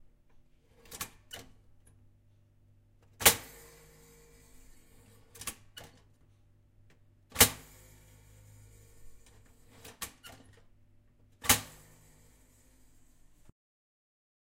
I'm a student studying sound and I and recording sounds this is one of the recordings.
This sound is of a toaster being turned on and off.